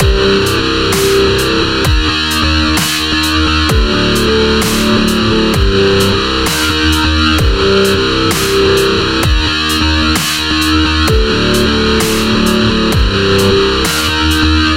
Guitar Dubstep Loop
fruity, 2015, sound, loop, guitar, dubstep